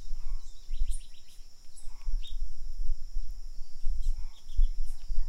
Silvo de aves
Sonido de aves grabado en un Campo en el día, a las afueras de UIO.
luz, Sonido, Pasos, d, a, sol, Campo